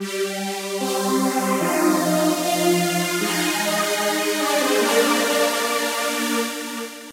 Riser 7 Flicker
More strings added. 150 bpm
150-bpm, flange, melody, pad, phase, strings, synth, techno, trance